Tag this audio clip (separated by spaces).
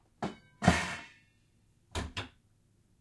crash soundeffect